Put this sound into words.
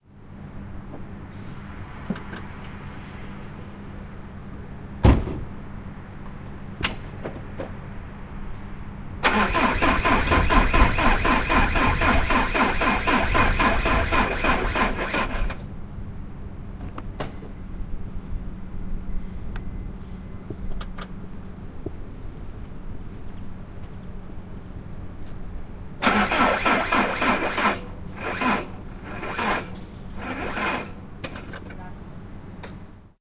Citroen Xantia 2.0 HDI Startversuche
Try to start my 2.0 HDI Citroen Xantia. Battery is emty.
start, car, diesel, battery, Citroen, emty